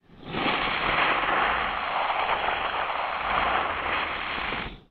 Alien Beast Monster Growling
alien, beast, big, eating, growl, growling, monster, scary, unknown